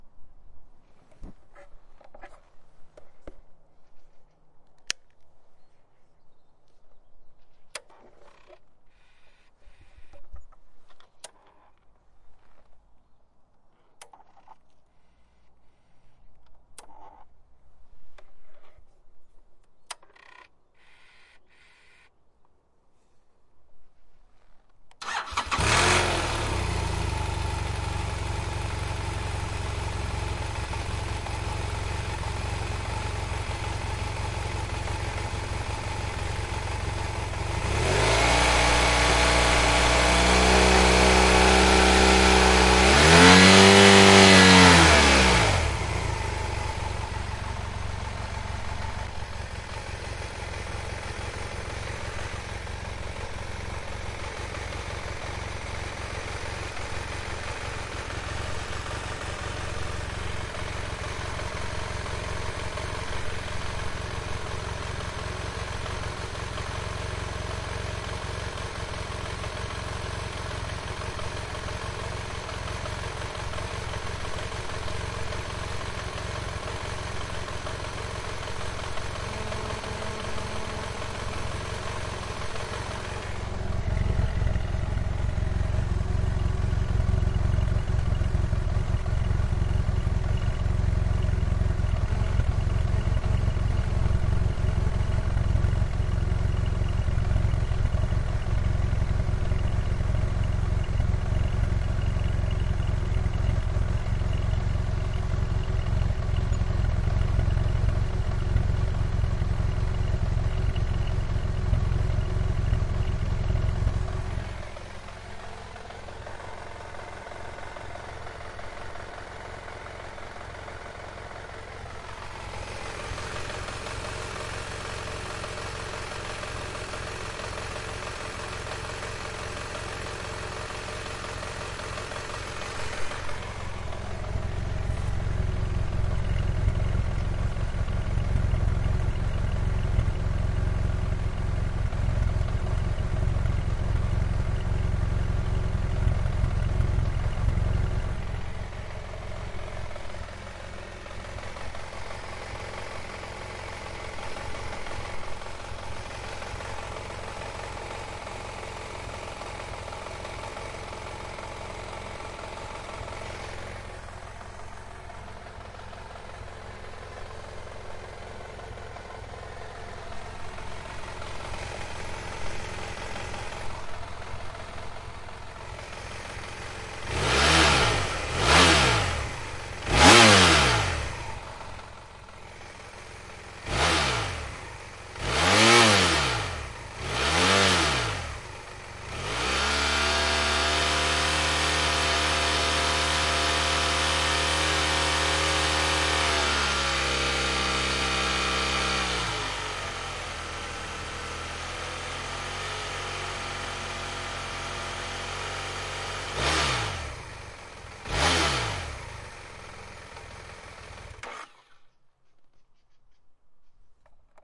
Bmw f800gs bike ignition, start, idle working, some revs.
Some occasional nature sounds
Recorded via Tascam dr100mk2